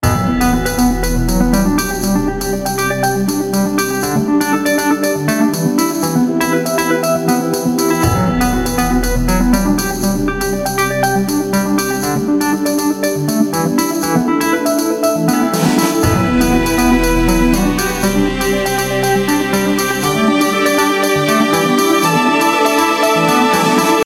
yet another "endless" loop created with vst instruments.
started off with the electric piano with the intention of making a quick ringtone and ended up adding cellos, percussion and a choir
have fun, hope you like it!
booming, epic, heroic, Hollywood, intro, loop, orchestral, ringtone, trailer